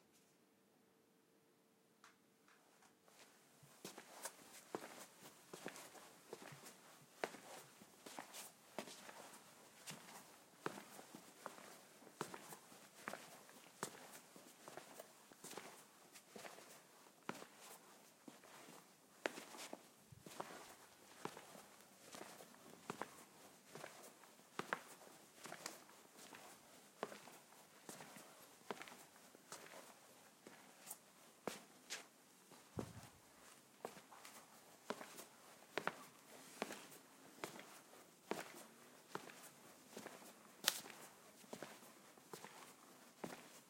steps walking2
Foot steps walking in tiled hallway with sneakers
recorded with oktava mc012->AD261->zoom h4n